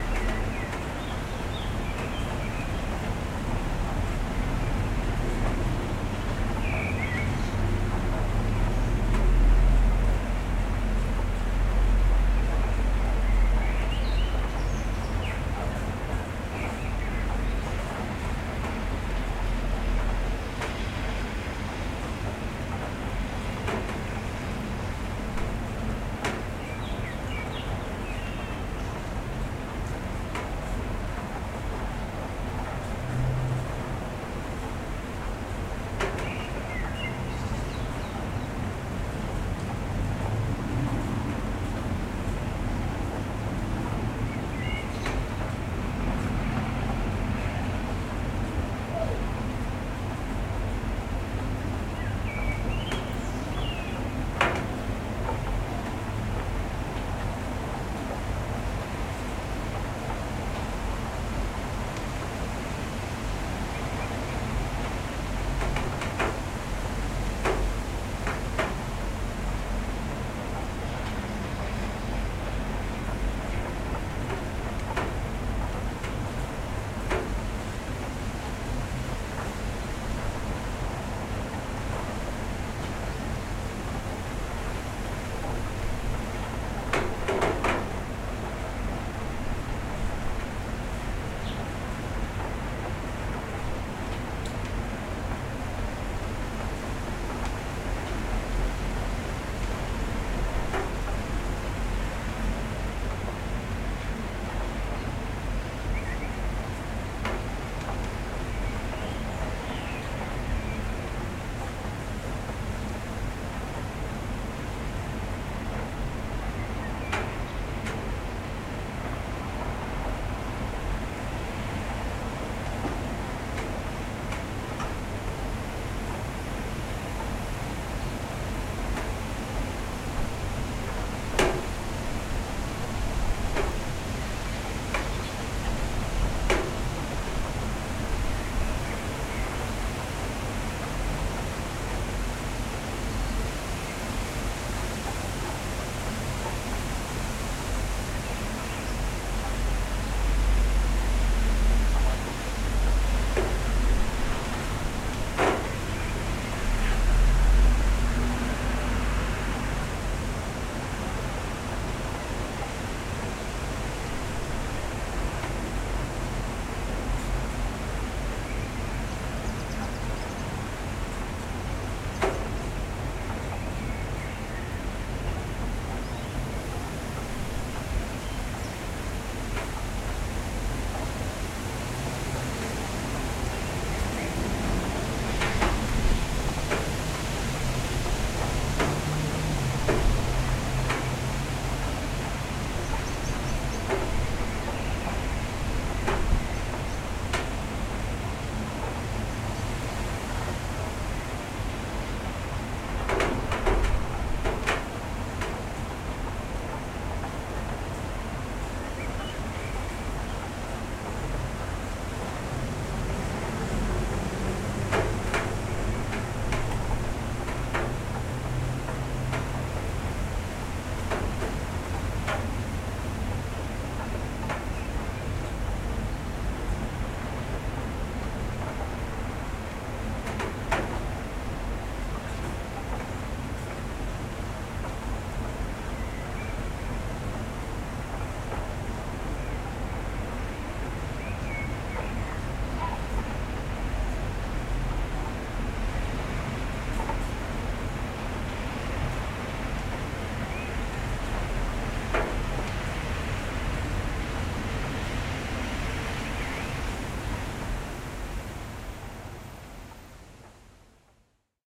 Rain3 in Tallinn
Recording by my Neumann TLM102 inside room, near opened window
Weather, Rain, Wind